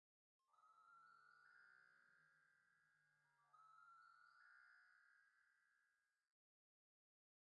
Sonido 1 (transformado) Sereno
calm, peaceful, relaxing